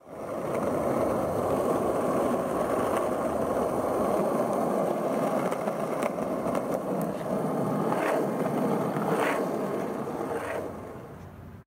skating on a gentle downhill slope recorded with a zoom h6.